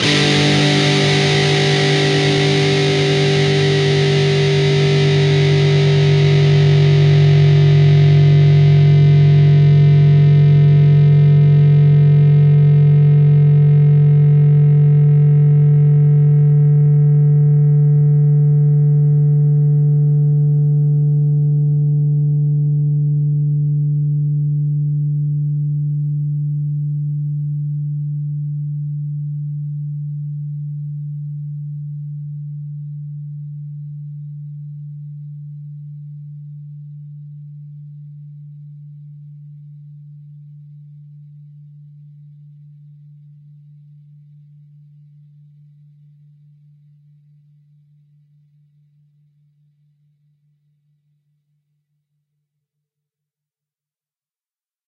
Dist Chr A&D 5th fret up
A (5th) string 5th fret, and the D (4th) string 5th fret. Up strum.
rhythm-guitar, rhythm, guitar-chords, distortion, chords, distorted, distorted-guitar, guitar